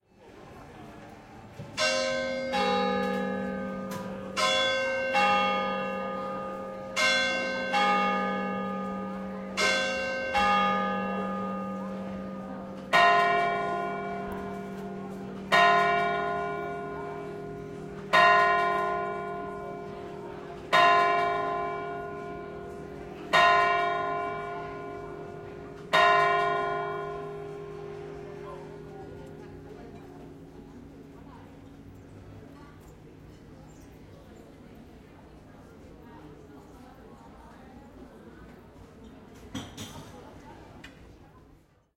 6pm church bell from Igreja da Graça, Lisboa/Lisbon. Recorded in February.
bell, bells, church, church-bell, clock, dong, Graca, Lisboa, Lisbon, Portugal